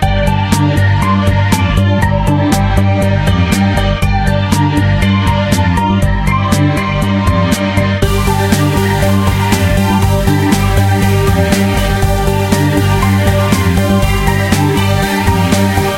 Loop Casual Space Exploration 10
A music loop to be used in fast paced games with tons of action for creating an adrenaline rush and somewhat adaptive musical experience.
Game, Video-Game, battle, gamedev, gamedeveloping, games, gaming, indiedev, indiegamedev, loop, music, music-loop, victory, videogame, videogames, war